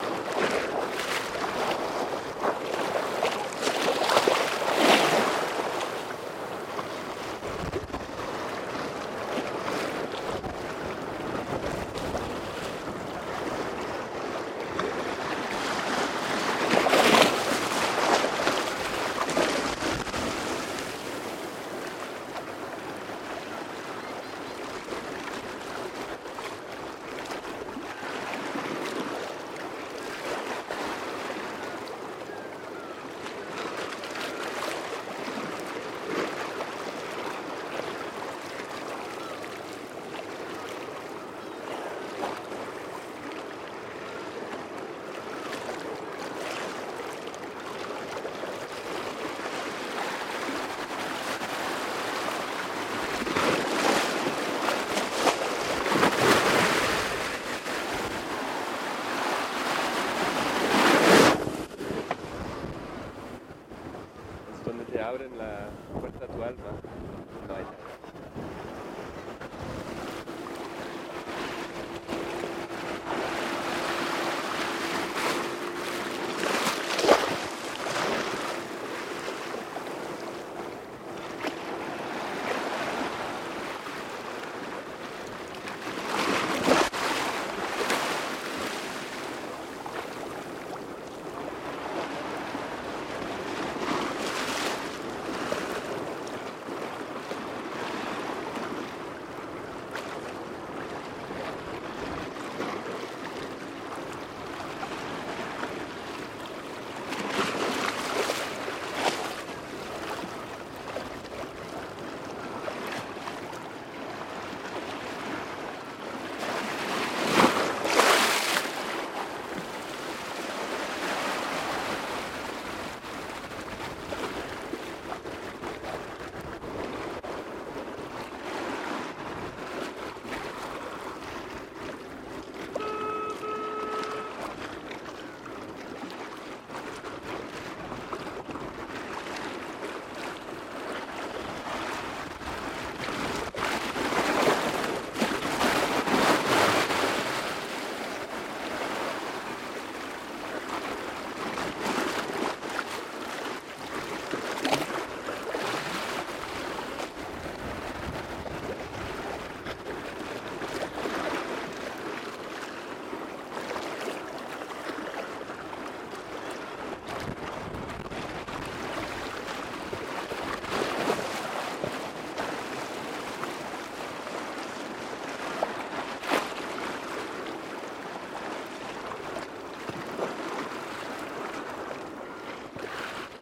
MAR OMAN 2
Another close recording of small waves on a stony beach in Oman. Nice crunch from the stones. (Mono 48-24; Rode NTG-2 Shotgun Mic/PMD 660 Marantz Portable Recorder.)
beach, dhofar, magoproduction, oman, sea, waves